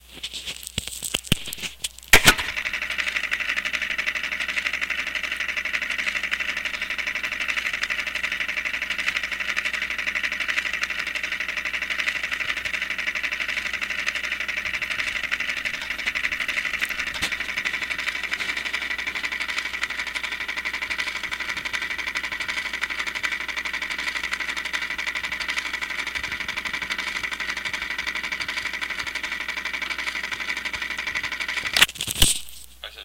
Sound 13 Refridgerator (contact)
running fridge but sounds like old theater film
film, old, reel